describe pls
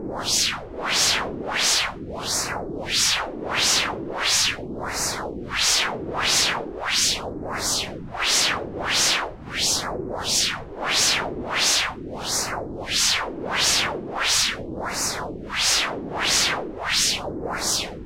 Loop: Generator
An electronic sounding loop with a small variation.
electronic, game-sound, phaser, sound